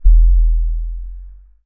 low thump
A low booming bass hit. Started life as a drop of water. Everything went downhill from there.
bass, beat, boom, deep, drip, drum, hit, kick, low, percussion, rumble, sub, thump